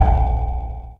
STAB 045 mastered 16 bit
An electronic industrial sound, resembling sonar sound, but with more
low frequency content. Created with Metaphysical Function from Native
Instruments. Further edited using Cubase SX and mastered using Wavelab.